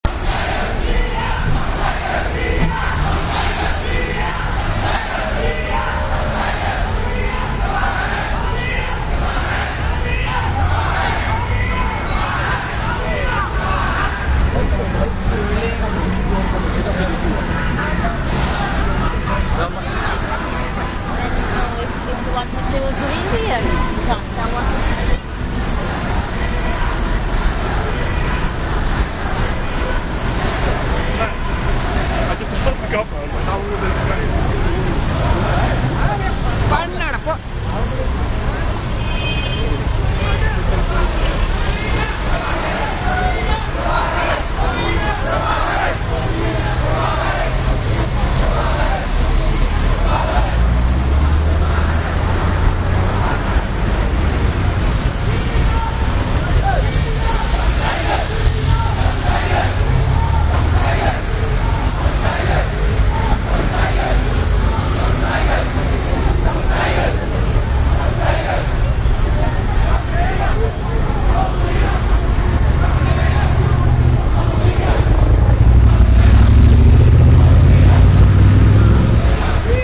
Westminster - Tamil Demo in Parliment Sq
field-recording, ambiance, binaural, london, ambience, department